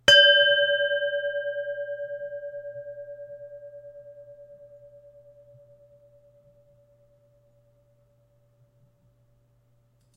Meditation bell, hit with a mallet. Recorded with a Blue Yeti microphone.
meditation, mallet, bell